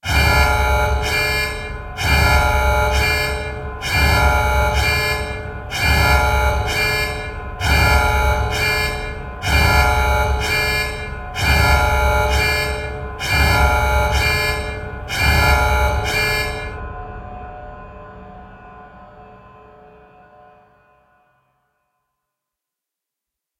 alarm sequence
synthetic industrial sounddesign
industrial, synth, fx, sequence, space, alarm, layers